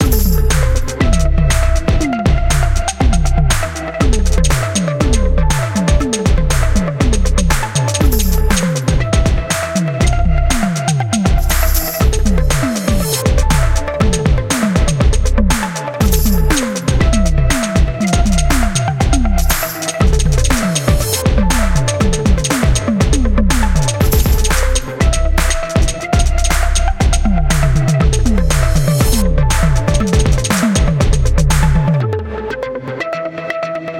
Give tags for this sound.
Loop; Propellerheads; Reason; Rhythm

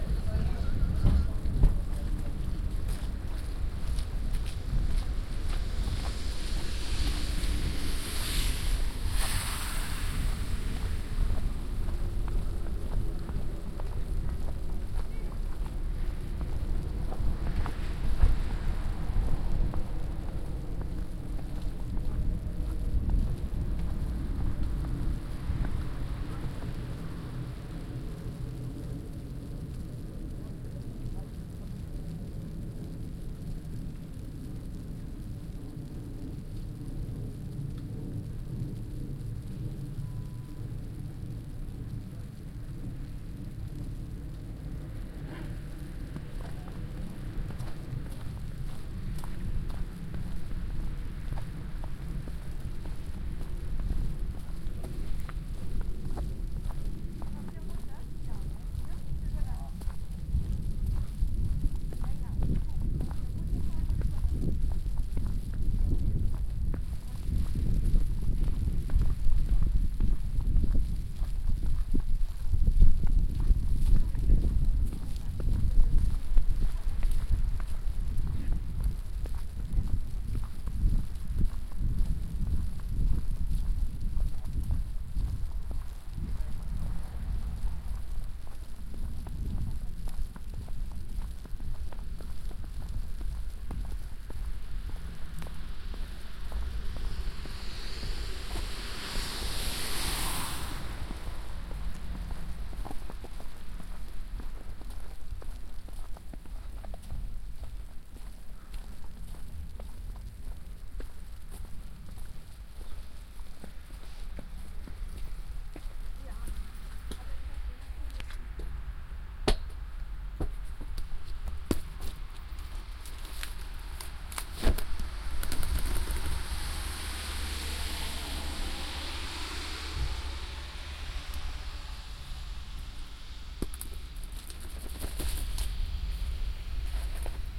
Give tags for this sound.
walking; binaural; field-recording; snow

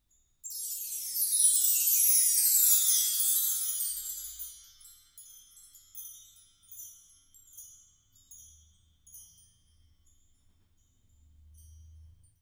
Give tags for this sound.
living; room; Chimes

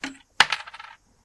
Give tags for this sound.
coin; Japanese

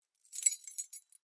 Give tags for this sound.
Samples; Foley

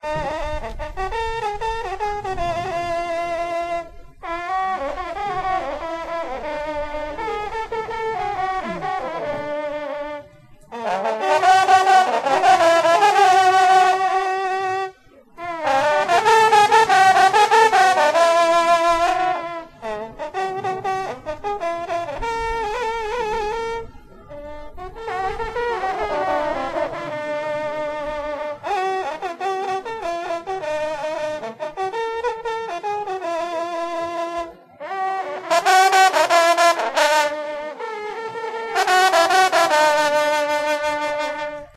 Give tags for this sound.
hunting; tradition; france; horn; berry

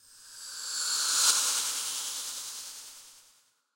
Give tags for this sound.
multimedia; swish; titles; whoosh

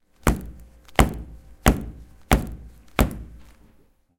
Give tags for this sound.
Slam; Primary

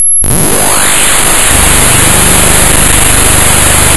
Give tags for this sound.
processed; sine

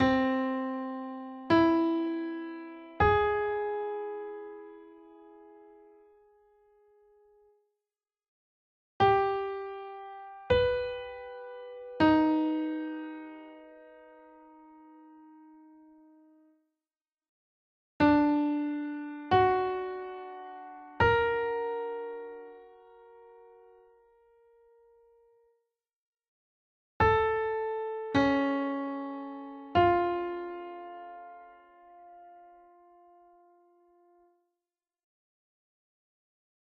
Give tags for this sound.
augmented; triads

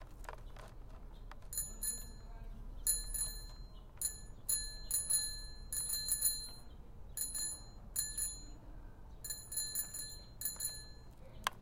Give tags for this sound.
ambient; background; background-sound; soundscape

